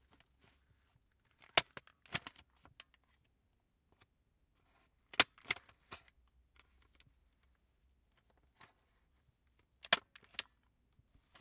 Stapling paper 02
Stapling papers with a metal stapler
crunch, stapler, office, metal